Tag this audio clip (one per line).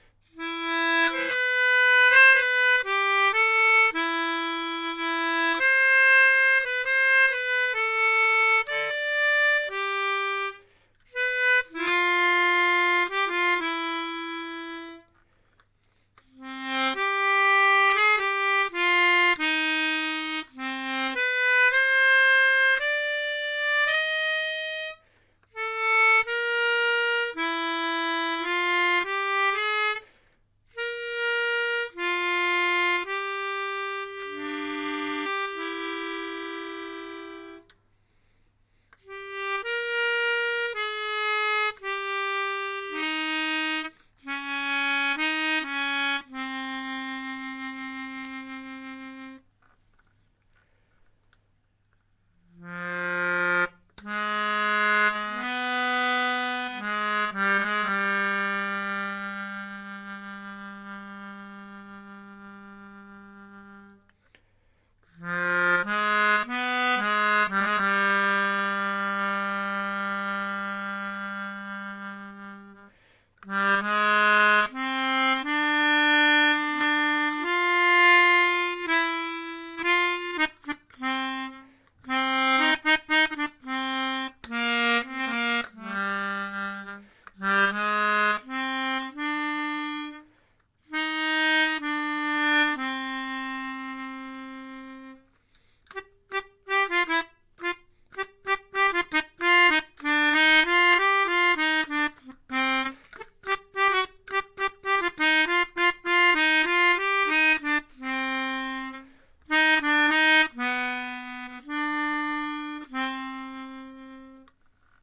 melodica
percussional
handheld